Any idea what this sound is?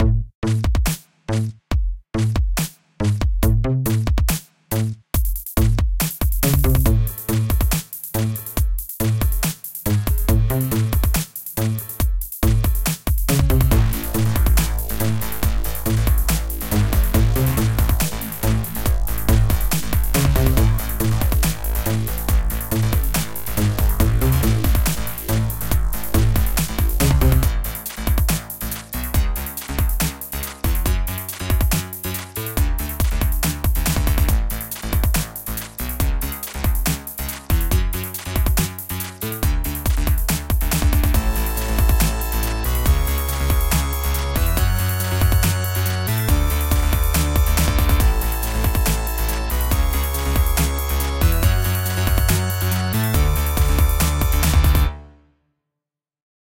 Melodic Synths
electronic, loop, music, synth